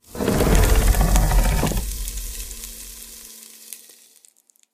Recorded and raised the gain very high, this is simply my fingernails gently rubbing against the fabric of my Fender Mustang IV amplifier. I also recorded some egg rolls cooking and got some of that spicy hot sizzle in there :) bass boosted in Audacity and equalized to perfection in Audacity.